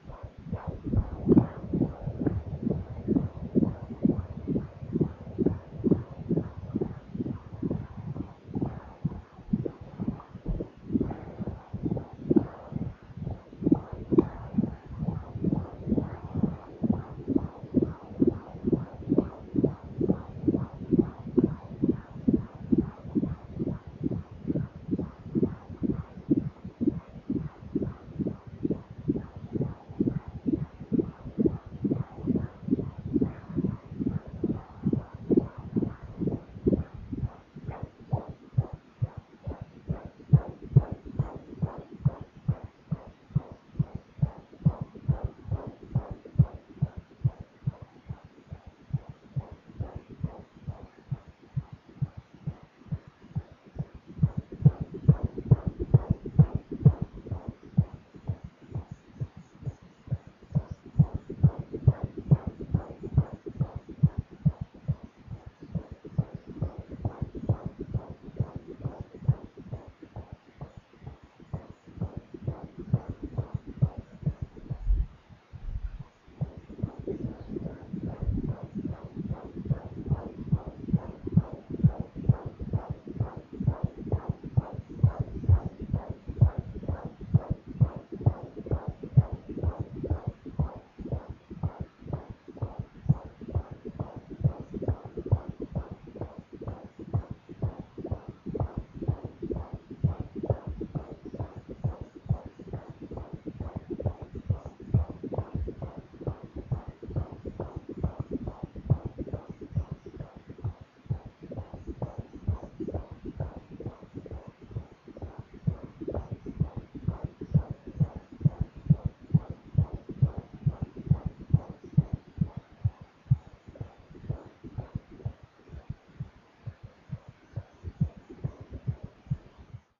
Baby Fetal Dobbler02
Baby fetal heart monitor II
Recorded on 8 months using dobbler baby fetal "microphone". Authentic sound, no processing done.